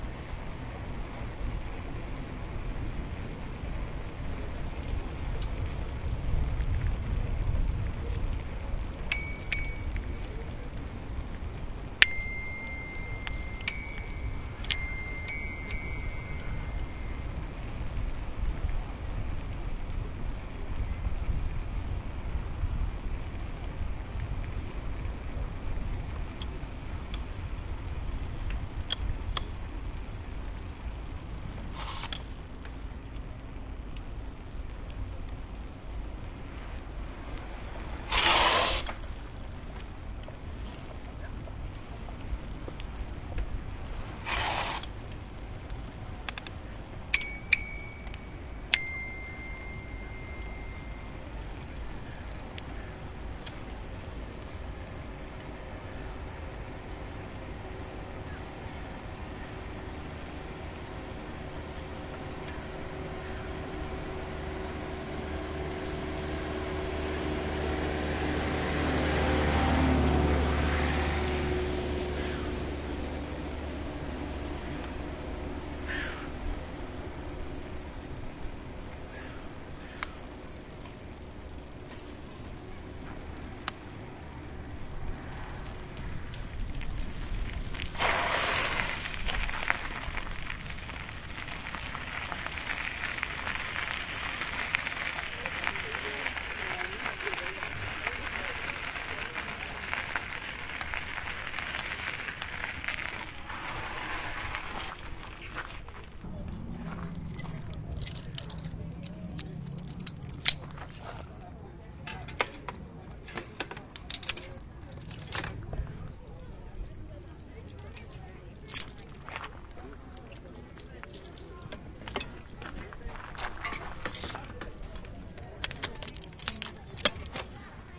Recording from the "Praterallee" in vienna.